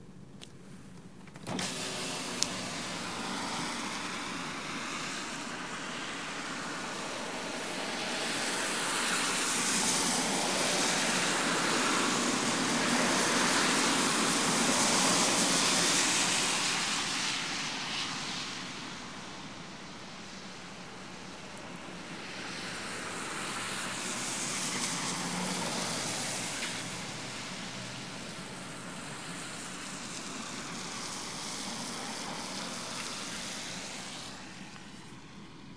cars passing on wet road
Recording cars as they pass on a wet street. March 3, 10 PM.
car, cars, field-recording, night, passing, road, traffic, wet